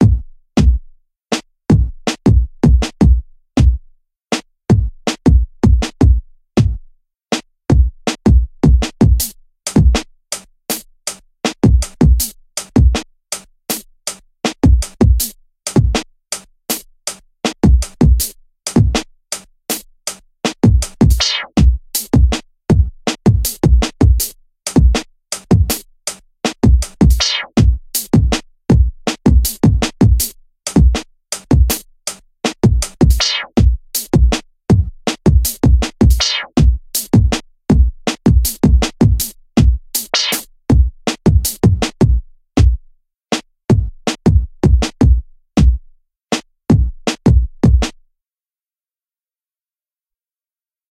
Drums track of Somy